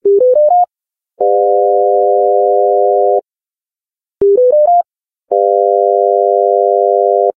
A major chord + minor 7th with following samples (listed in order):
An equal tempered arpeggio
The matching equal tempered chord
An arpeggio with just intonation
The matching chord with just intontation
chord, equal-tempered, intonation, just, m7, minor-seventh, tuning